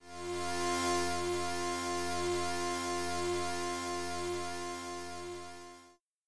Electric Wave Noise 01
8bit, computer, cool, effect, electricity, old, original, retro, sample, school, sound, tune, wave, woosh